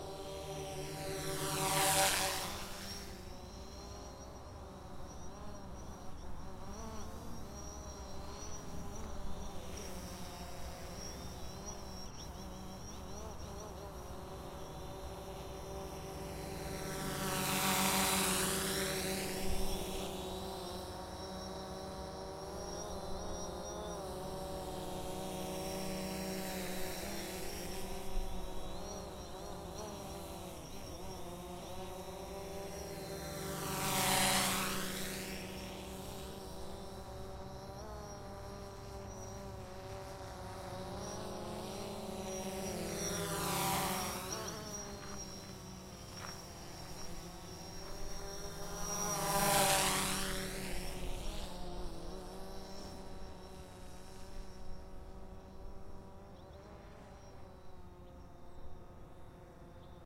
UAS Drone Pass 04
Recording of a DJI Phantom 4 Pro flying by. Recorded with a Tascam DR-40